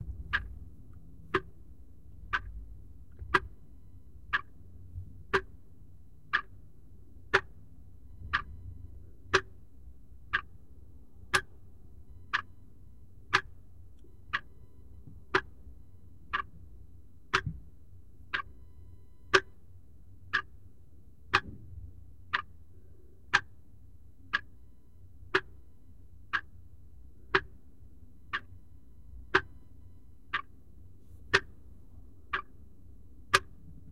Clock in Room
Indoor, Room, Clock, Ambiance, Movie, Field, Field-recording, Noise, House